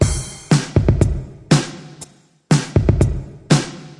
Just a drum loop :) (created with Flstudio mobile)